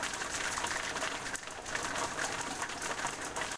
inside-rain-heavy-3
Heavy rain heard from inside my home.